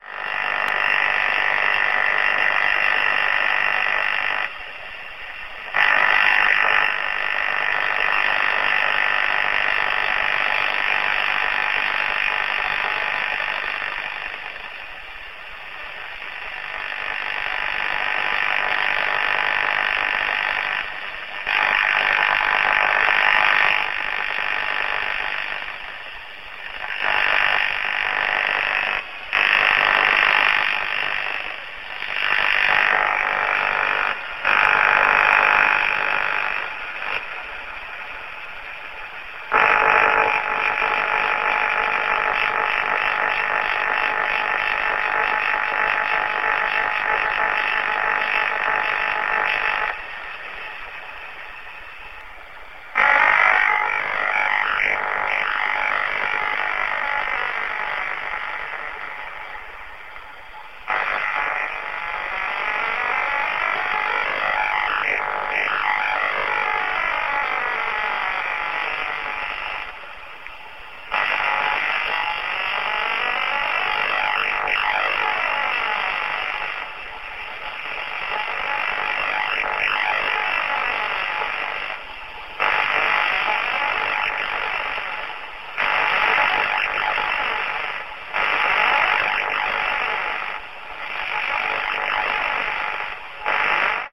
QRM local 80 m mode AM
QRM listened in AM mode on 80 m band in my QTH JN79EK. If I use CW mode listening and narrow filter, the QRM is mostly worse. Strength: peaks S9+40 dB. Sometimes any traffic impossible.
From -41st sec. of record is possible to heard my tuning around frequency.
AM, amateur-radio, jamming, QRM